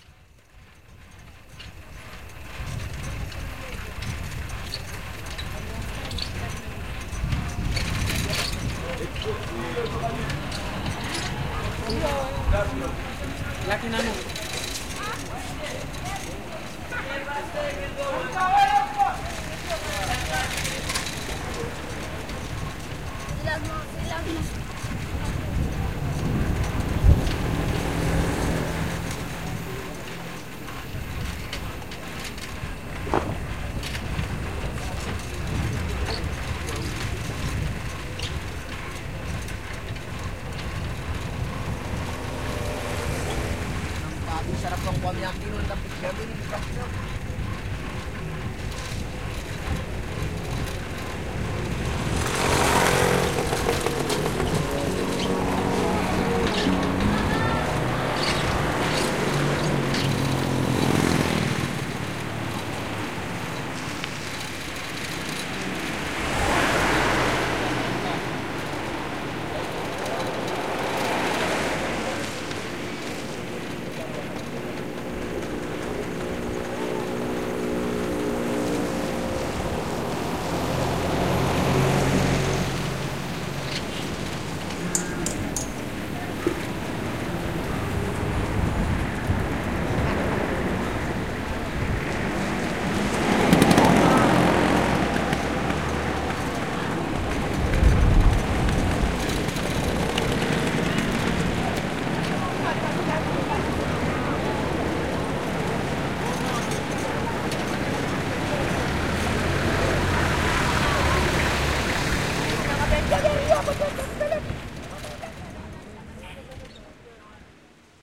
kiembe samaki-07juni-1900-del
A bicycle ride on Kiembe samaki road in the outskirts of Stone Town on Zanzibar. On the 7 June 2006 at 19:00h. I mounted the Sony ECMMS907 microphone on the front of the bike and started recording on my way home in the evening. Recorded on a Sharp MT190H Minidisc.
zanzibar, bicycle, field-recording, street